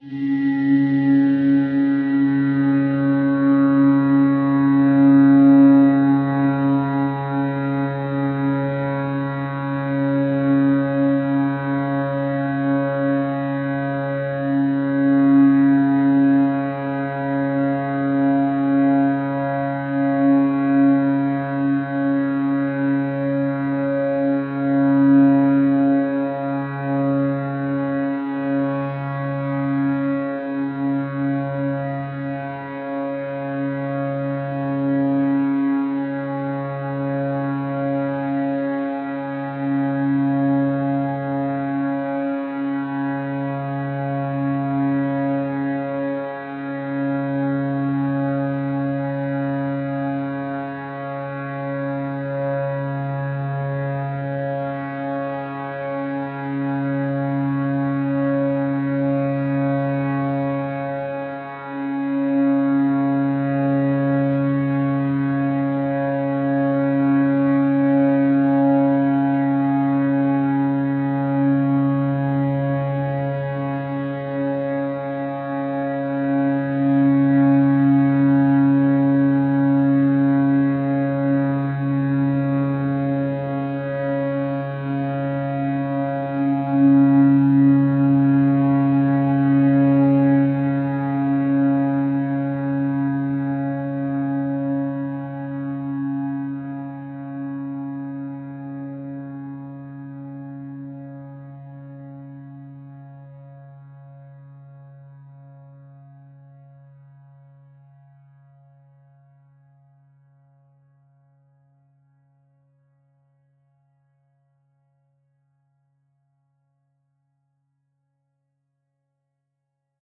LAYERS 014 - THE YETI-62
LAYERS 014 - THE YETI is an extensive multisample package containing 128 samples. The numbers are equivalent to chromatic key assignment covering a complete MIDI keyboard (128 keys). The sound of THE YETI is one of a beautiful PAD. Each sample is more than minute long and has a sweet overtone content. All samples have a very long sustain phase so no looping is necessary in your favourite samples. It was created layering various VST instruments: Ironhead-Bash, Sontarium, Vember Audio's Surge, Waldorf A1 plus some convolution (Voxengo's Pristine Space is my favourite).
overtones, ambient, multisample, pad